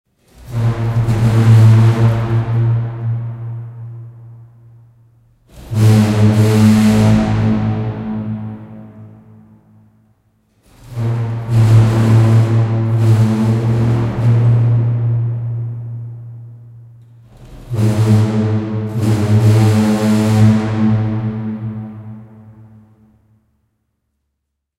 Four attempts to open and close a door in a large abandoned stone and marble building. The door is stuck to the ground resulting in a large steamboat like sound. Huge resonance. Rich in frequencies. Recorded with Zoom H2 and Rode NT4.